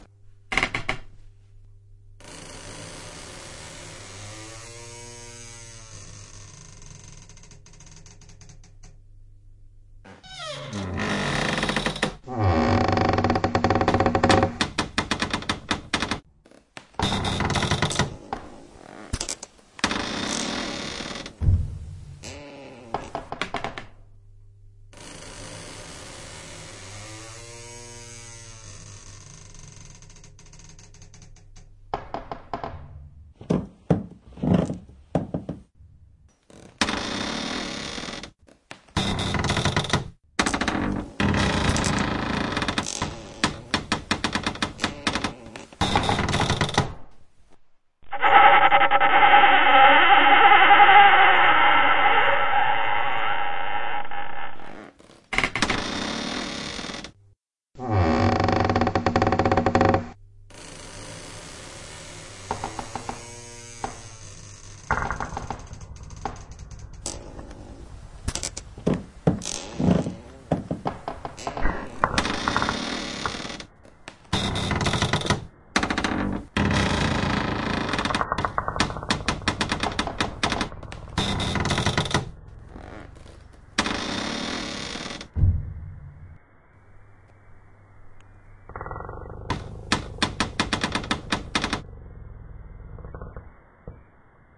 fx CREAKS MIX
A mixed track to simulate the sounds of a building that has been ripped from the foundation and landed upside down on another building and is teetering about to colapse
Creaks, groans, squeaks